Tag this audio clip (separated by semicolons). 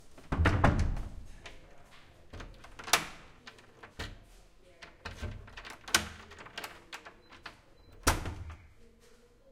antique; castle; close; door; dungeon; heavy; key; latch; lock; open; turn; unlock; wood